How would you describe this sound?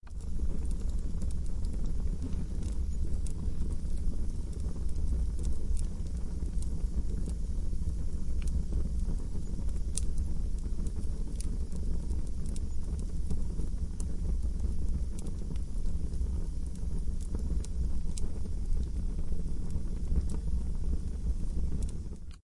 fire cracking 01
Fire cracking in my fireplace